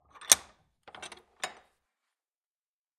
Leg vise - Turn fast
Leg vise being tightened.
1bar, 80bpm, clamp